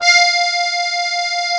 real accordeon sample